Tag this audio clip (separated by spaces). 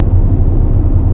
microphone digital test